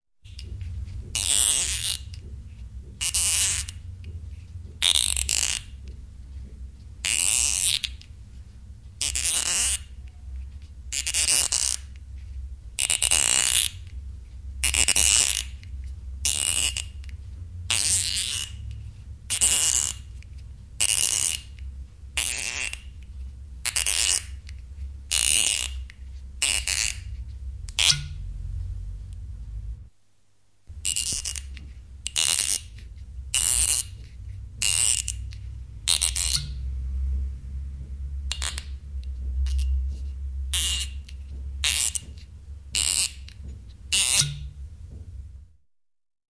Pullo, korkki auki, viinipullo / Wine bottle, opening with a corkscrew, genuine cork, twisting, creak, squeak, pop, various versions
Aito korkki. Korkin narinaa ja kitinää, poksahdus, aukaisuja korkkiruuvilla. Erilaisia.
Äänitetty / Rec: Analoginen nauha / Analog tape
Paikka/Place: Yle Finland / Tehostearkisto / Soundfx-archive
Aika/Date: 1980-luku / 1980s
Pullo, Finland, Aukaisu, Korkki, Finnish-Broadcasting-Company, Pullonkorkki, Bottle, Auki, Bottle-cork, Corkscrew, Cork, Tehosteet, Korkkiruuvi, Open, Soundfx, Yle, Yleisradio, Field-Recording, Uncork, Suomi, Pop, Avata, Korkata